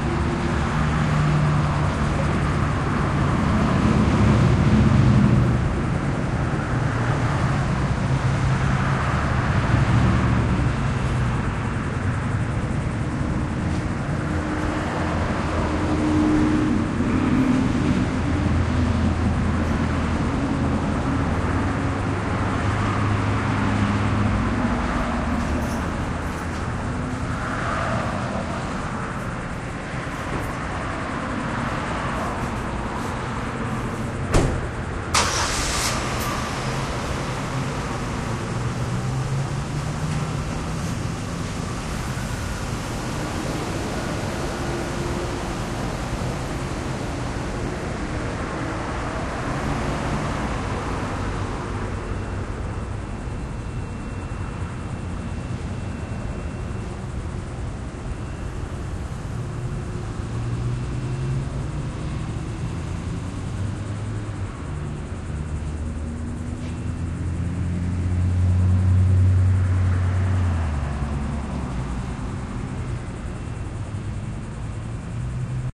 traffic jupiter carport
Sounds of the city and suburbs recorded with Olympus DS-40 with Sony ECMDS70P. Sounds of the street and passing cars.
city, field-recording, traffic